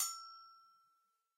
This pack is a set of samples of a pair of low and high and pitched latin Agogo bell auxilliary percussion instruments. Each bell has been sampled in 20 different volumes progressing from soft to loud. Enjoy!